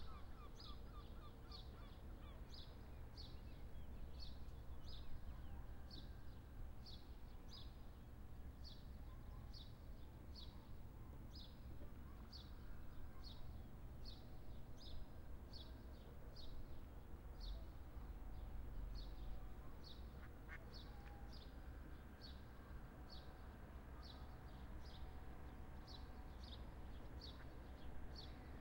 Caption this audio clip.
porto morning riverside birds 03 1
Porto, Portugal, Douro river, 19th July 2009, 06:00: Morning mood before sunrise at the riverside with birds singing and seagulls shouting. At one point you can hear a duck and in the end you have a car passing by in the distance.
Recorded with a Zoom-H4 and a Rode NT4 mic.
athmosphere, before, city, duck, field-recording, morning, porto, riverside, seagulls, smc2009, sunrise